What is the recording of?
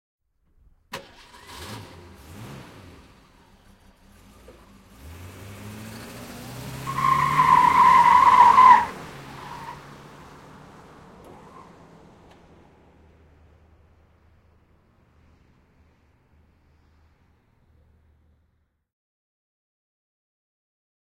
Ford Mustan pulling away on asphalt, tyres screeching // Ford Mustang, lähtö asfaltilla, renkaat ulvovat
Starting motor, pulling away on asphalt tyres screeching, some driving, fade out.
Recorded outside of a car.
Käynnistys autossa, lähtö renkaat ulvoen asfaltilla, ajoa mukana vähän matkaa, häivytys. Nauhoitettu auton ulkopuolelta.
Paikka/Place: Suomi / Finland / Nummela.
Aika/Date: 1990.